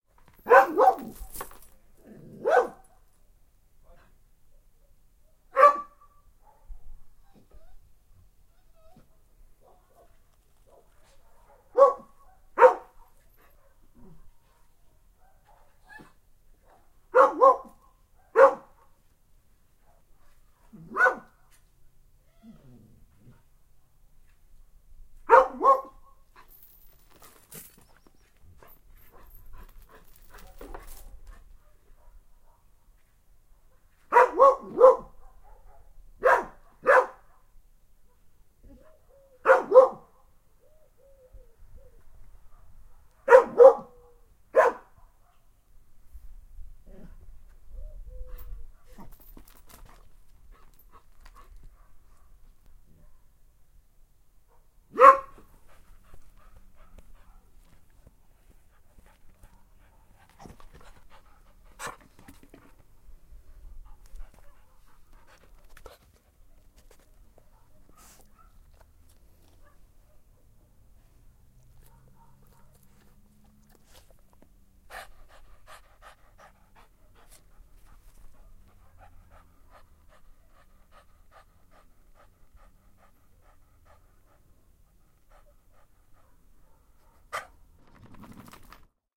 I was playing with my mixed-breed dog, Mia, the other day, and so recorded her doing things. Running around, barking, panting, etc.
Sorry for the handleing noise and other unwanted noises you might find throughout the recording; I didn't even plan to record but then I thought why not, so I was unprepared.
Have a wonderful day; sharing is caring ☺